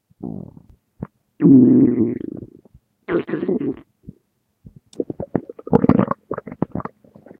yet more stomach sounds
gas, noise